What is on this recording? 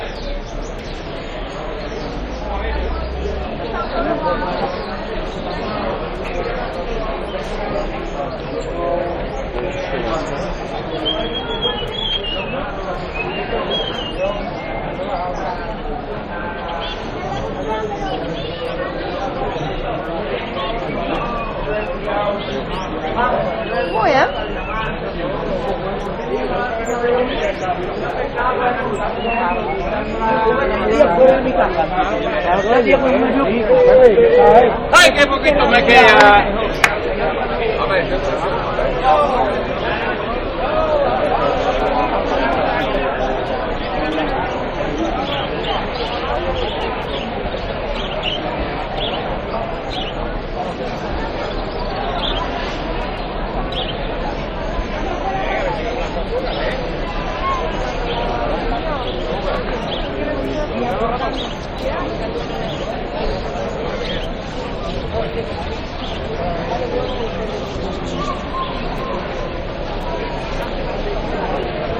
ambient noises in the traditional (and now extinct) Sunday flea market of pets named 'La Alfalfa'. Low fidelity / Ambiente en el mercado callejero de animales llamado La Alfalfa, que se celebraba los domingos en Sevilla. Baja fidelidad